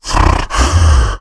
A powerful low pitched voice sound effect useful for large creatures, such as orcs, to make your game a more immersive experience. The sound is great for attacking, idling, dying, screaming brutes, who are standing in your way of justice.
vocal, RPG, monster, deep, low-pitch, gaming, videogames, Speak, indiedev, fantasy, gamedeveloping, videogame, brute, arcade, indiegamedev, voice, games, Talk, game, gamedev, Voices, male, troll, Orc, sfx